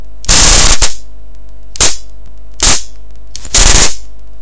A police 250 Milion volt electroshock self-defensive stun gun.